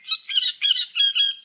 20070506.rubber.duckies.07
squeaks from actual birds (Coot, Great Reed Warbler, Little Grebe) which may remind a toy.